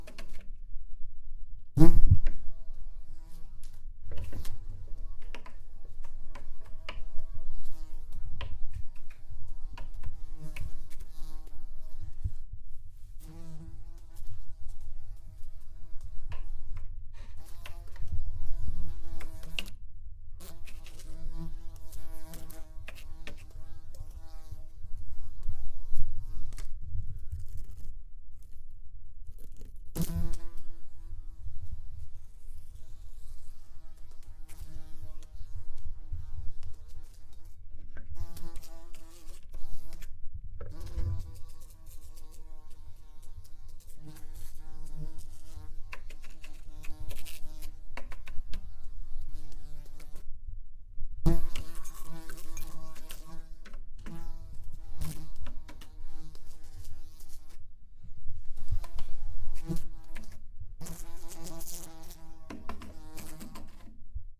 A fly buzzing around. The thumps are the fly bumping into the wall and a lampshade.
This file is dry, so there's some low-frequency garbage from my holding the mic and following the fly. A high pass at around 200Hz fixes that.